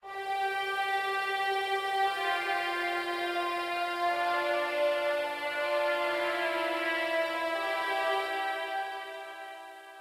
FORF Main Theme Strings 02
movie, soundtrack, cinematic, orchestral, epic, strings, trailer